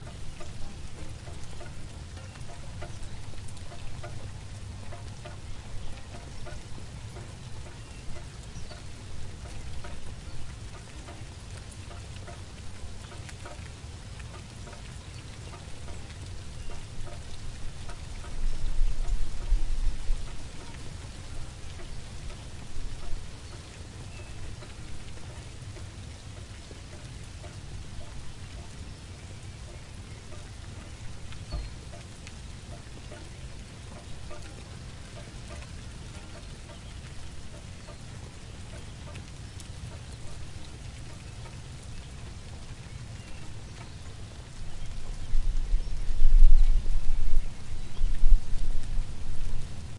Cacophony of rain, birds singing and water from a gutter dropping into a square plastic box.